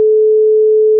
Pure loopable 432 Hz sound (1 second)
432 Hz is believed to have beneficial effects on body and mind. There is a big production based on this frequency from classical music to recent chillout and ambient music. Pink Floyd used it too as an alternative to the standard 440 Hz tuning on the A note.